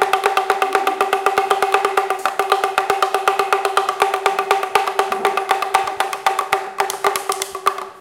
mySound JPPT5 Beatriz
Sounds from objects that are beloved to the participant pupils at Colégio João Paulo II school, Braga, Portugal.
Beatriz, Joao-Paulo-II, percussion